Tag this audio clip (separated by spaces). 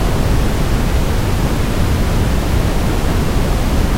korg ms20 noise pink